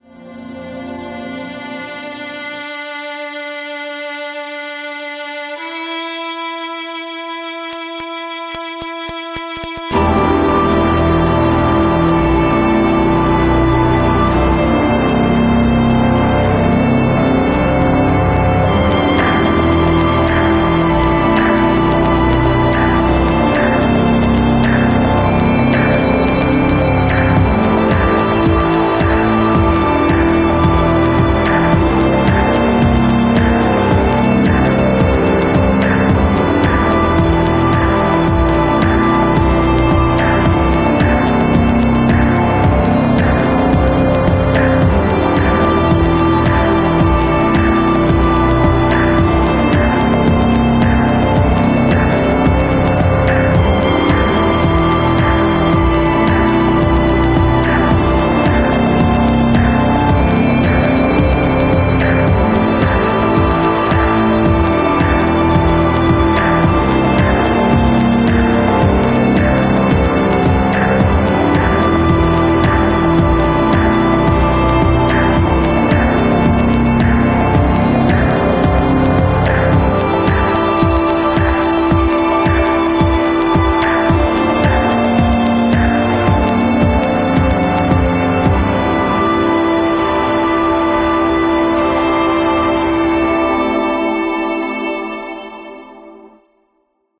Grey Sky Piece
Melancholic piece of music with strings and a minimal beat. Made quite some time ago in Reason 3.
ambience, ambient, atmospheric, classical, dark, grey, grey-sky, melancholic, melancholy, strings, synth, threatening